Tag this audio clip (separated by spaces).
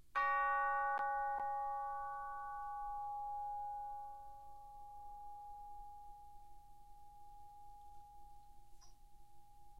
bells sample decca-tree orchestra chimes music